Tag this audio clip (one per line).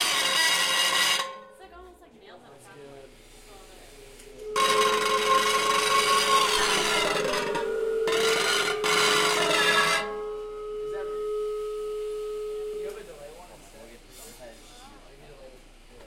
futurist
Intonarumori
Russolo